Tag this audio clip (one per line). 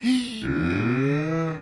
scary
susto
terror